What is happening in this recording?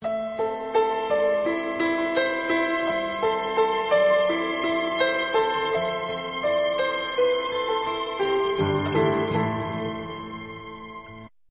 Piano,the original song is called fleur de feu.
original, fleur, called, piano, song, de